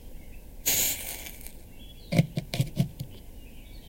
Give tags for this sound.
cigarette-being-put-out
cigarette-extinguishing
burning-sounds
the-sounds-of-a-cigarette-dying
cigarette-sounds
the-sounds-of-a-cigarette-being-put-out
cigarette-dying
OWI

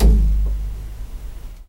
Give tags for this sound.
string
bass
sauna
field-recording

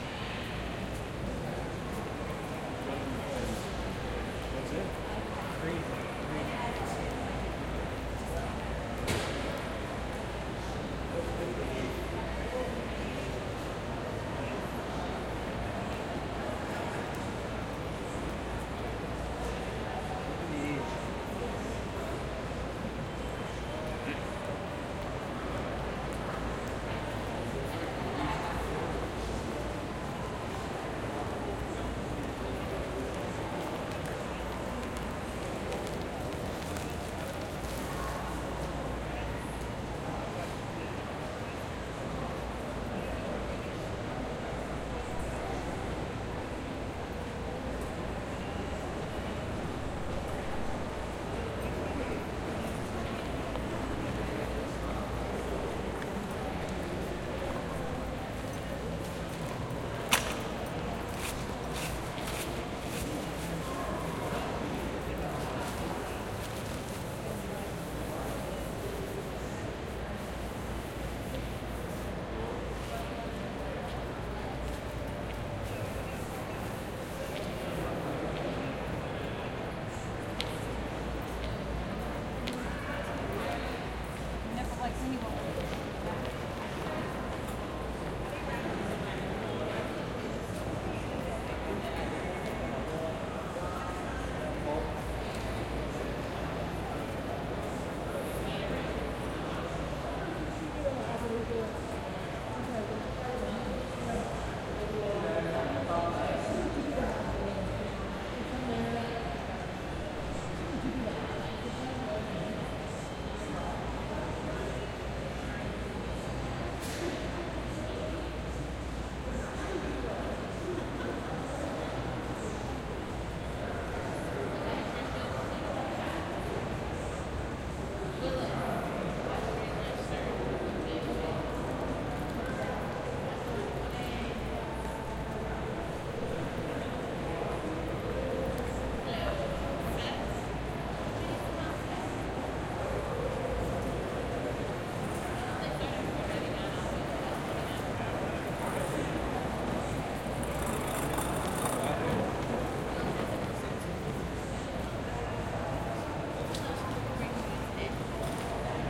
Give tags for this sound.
ambience
calm
dallas
mall
shopping

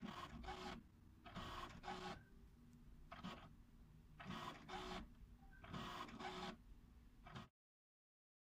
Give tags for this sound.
industrial
metal
robotic

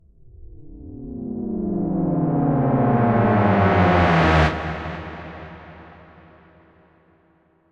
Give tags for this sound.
abstract
digital
effect
electric
electronic
freaky
free-music
future
fx
game-sfx
glitch
lo-fi
loop
machine
noise
sci-fi
sfx
sound-design
soundeffect

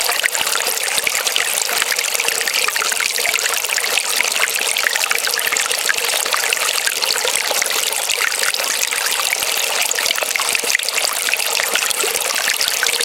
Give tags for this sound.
Rain
country-road
water
hill-side

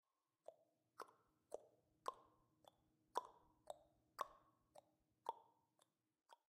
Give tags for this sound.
MTC500-M002-s13
tick
tock

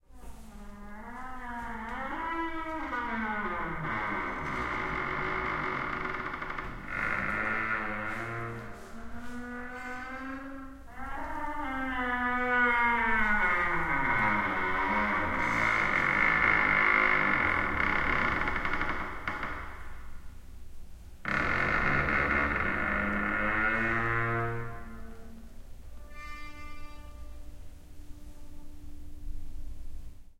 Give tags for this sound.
Door
creak
musical
squeal

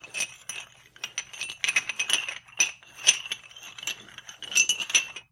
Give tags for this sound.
Ceramic
Clang
Handle
Handled
Move
Moved
Scrape
Tile
Tiles
Tink

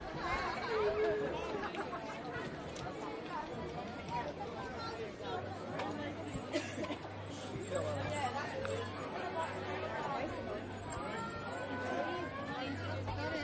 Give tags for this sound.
ambient
chat
crowd
field-recording
parade
people

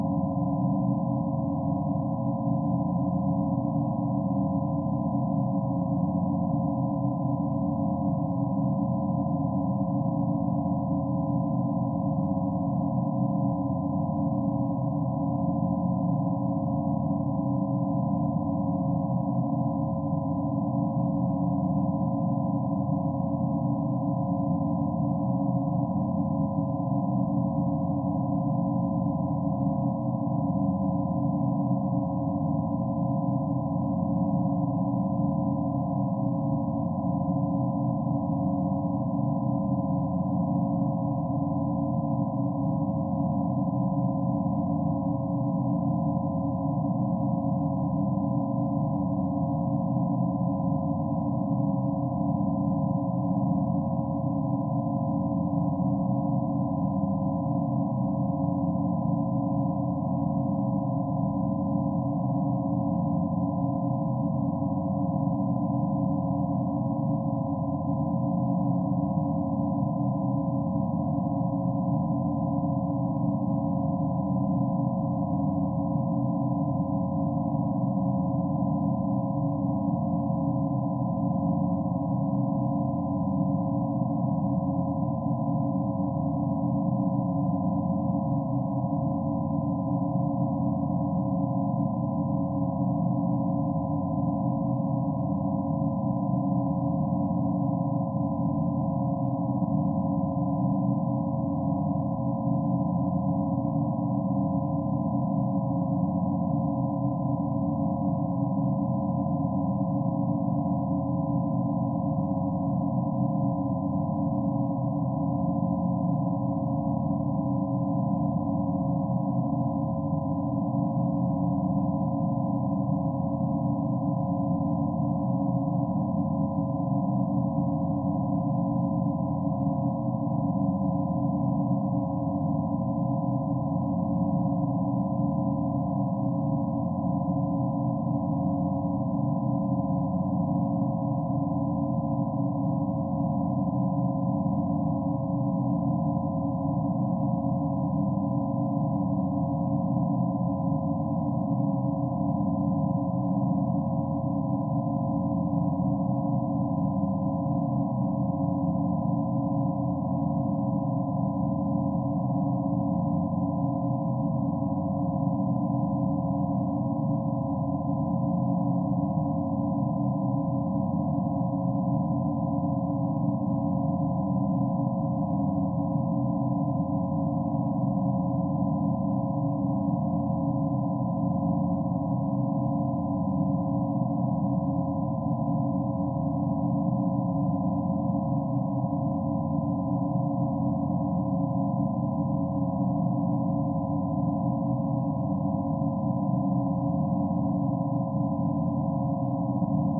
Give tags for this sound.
ambient
background
electronic
experimental
loop

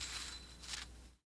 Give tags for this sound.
vintage
lo-fi
lofi
collab-2
misc
tape
mojomills
Jordan-Mills

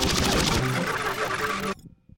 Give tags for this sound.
soundscape
glitch
cinema
999-bpm
melody
idm

